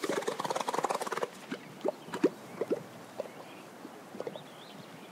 Elephants-Gargoullis
Noise from the stomach of a drinking elephant in Tanzania recorded on DAT (Tascam DAP-1) with a Sennheiser ME66 by G de Courtivron.
water, africa, elephant, tanzania, drinking